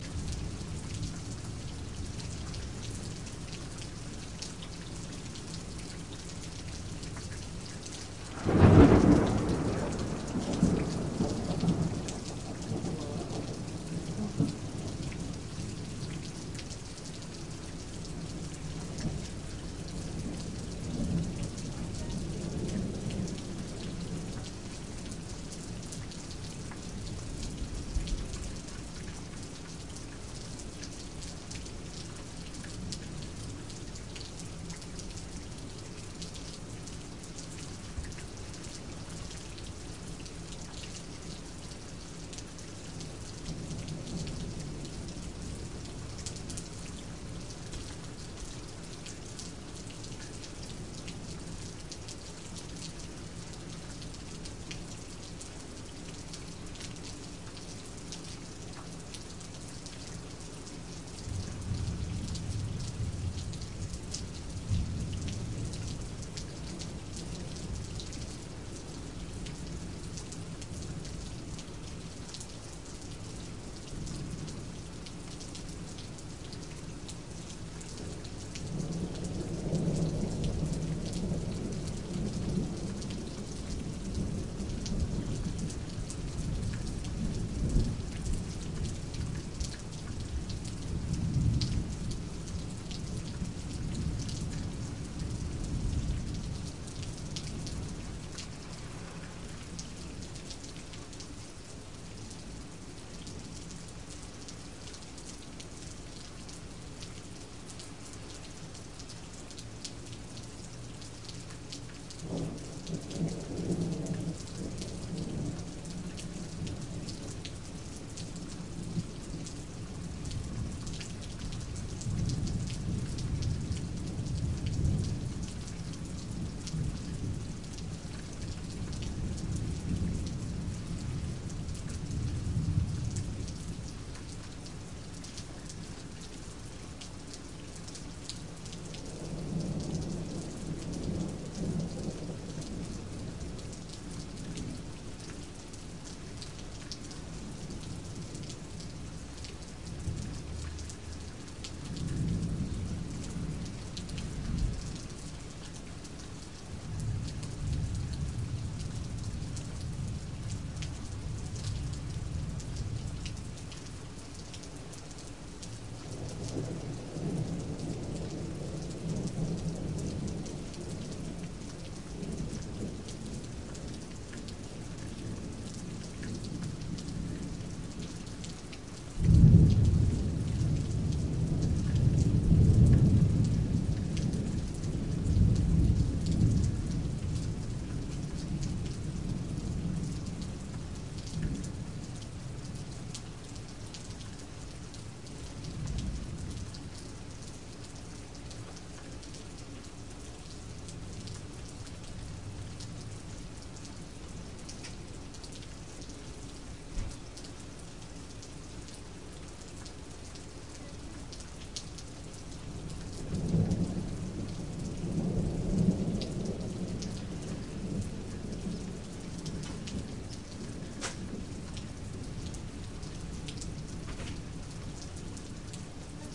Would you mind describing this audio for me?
thunder and rain u can use in whatever u like